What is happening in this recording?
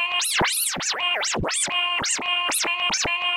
57926 Trance-Scratch
Lots of hits + longer scratches.
turntables, scratch